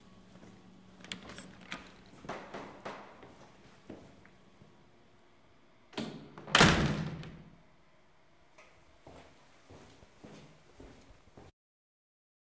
Door OPEN CLOOSE
Door Open Close
Close, Open